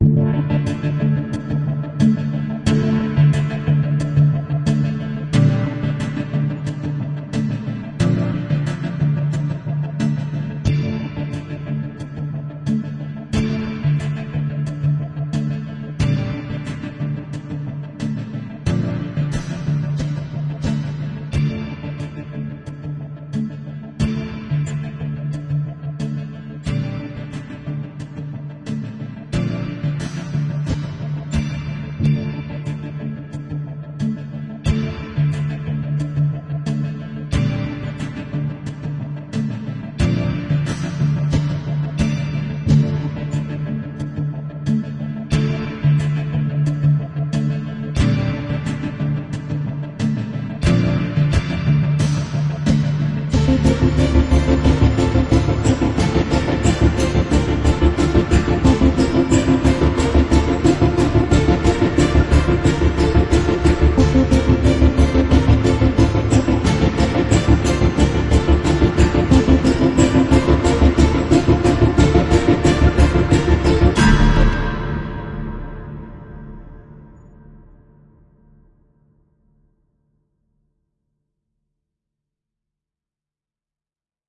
Created in Fruity Loops, NI Kontakt with many KORG synth sound.
Thanks all!
This electronic music style i used for my game project on Unreal Engine.
If you liked this sample, or style, please use for any your project!
Enjoy, it's free!
And always free!
Thanks!